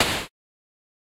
A bit of noise which resembles a snare drum if you squint.